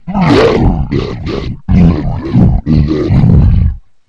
I generated this sound for a scene of a short video in which a zombie attacks my friend. It's a loud burst of roars and snarls, altered using Audacity. I recorded myself doing my best zombie voice, then I lowered the pitch, boosted the bass, and added a phaser to make it more wet and added some feedback. I hope you find it useful!